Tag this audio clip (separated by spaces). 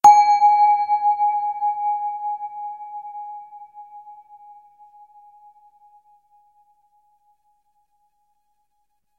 digital ding sound-design